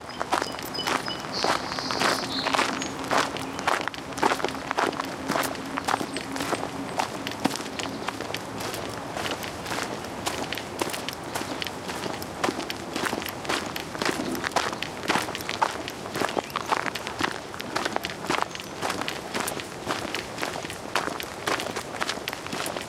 A recording of a walk on a gravel path in Golden Gate Park San Francisco with birds and passing traffic.